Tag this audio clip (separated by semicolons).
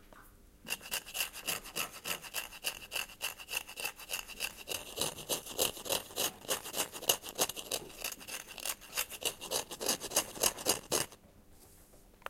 Belgium; Brussels; Jans; Molenbeek; mySound; Sint